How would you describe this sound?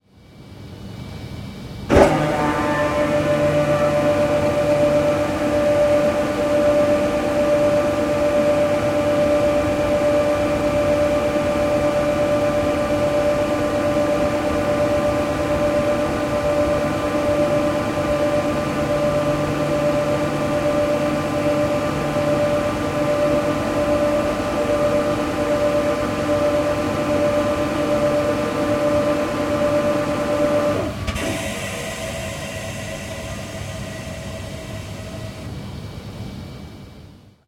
Tilt Train Compressor
Recording of a compressor working underneath a tilt train.
Recorded using the Zoom H6 Stereo Shotgun module.